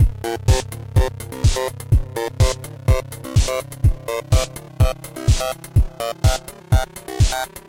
Drums loop Massive 120BPM
120bpm, loop, drums